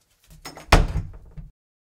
Door Close 6
Wooden Door Closing Slamming
closing, door, slamming, wooden